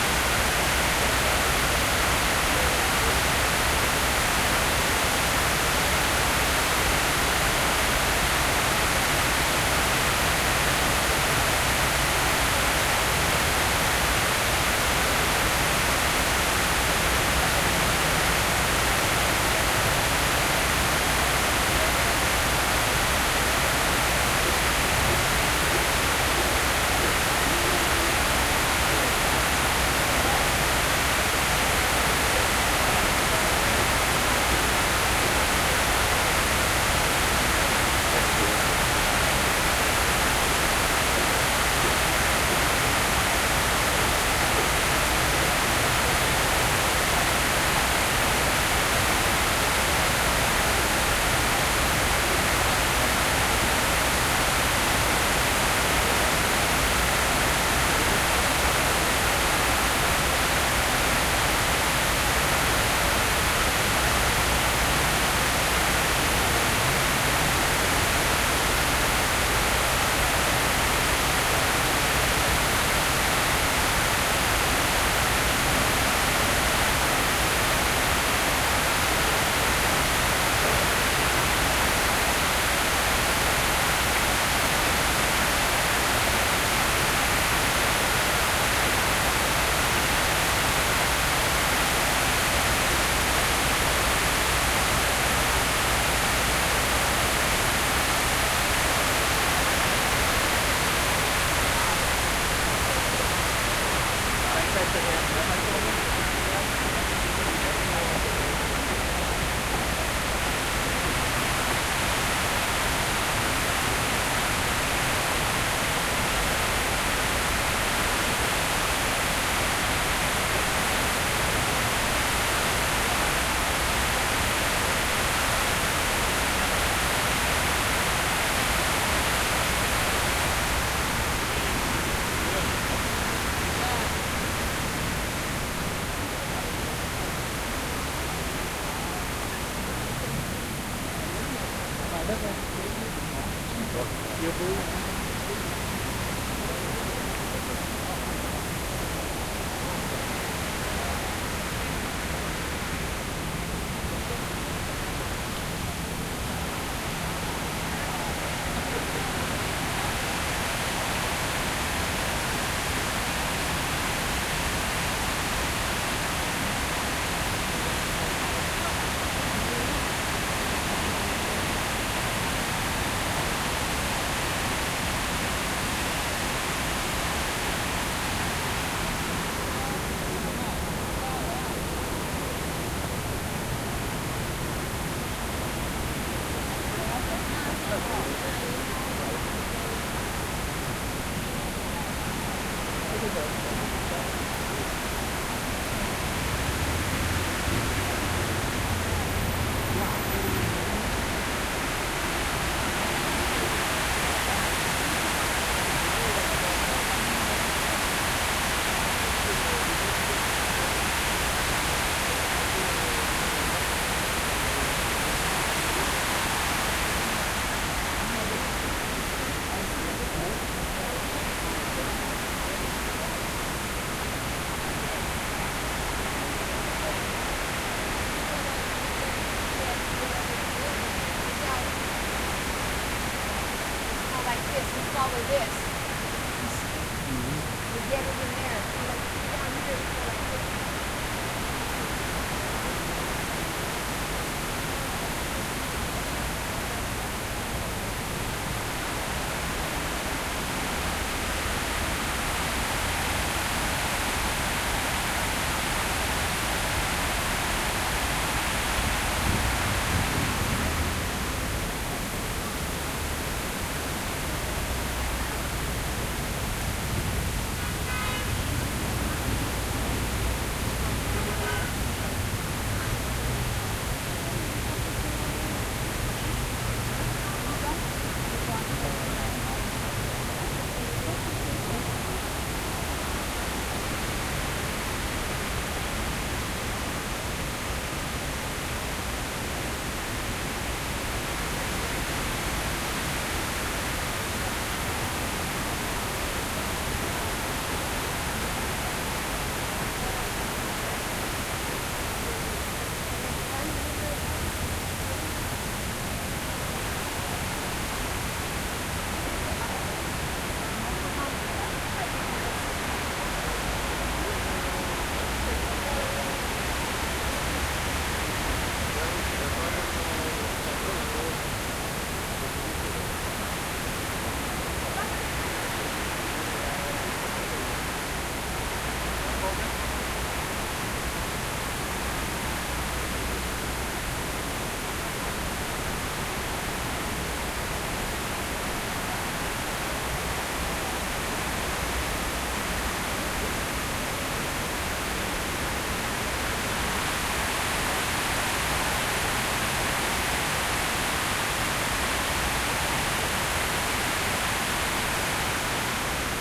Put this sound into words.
9/11 Memorial Fountains, New York
field-recording, fountain, new-york, water-fountain